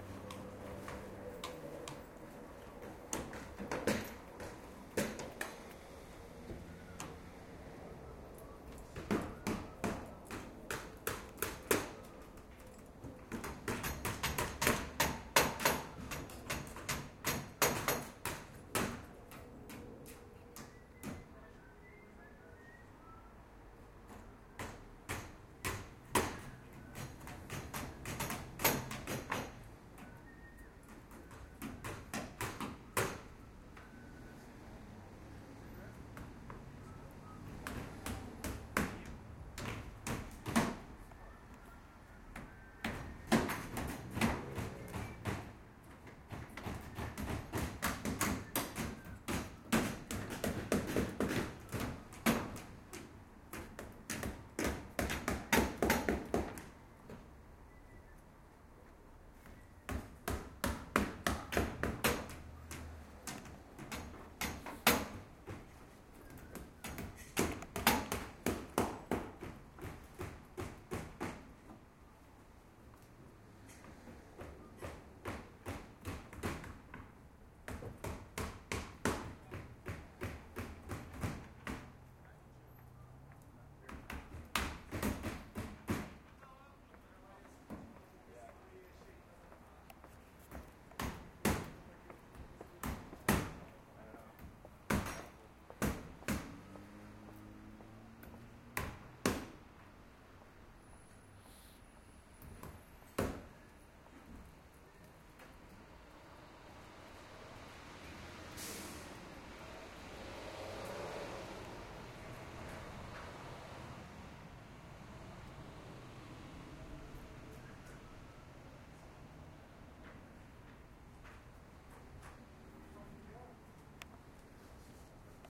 house construction
this was a house they were making
please tell me wath are you using it for
construction, demolish, demolition, dig, digging, house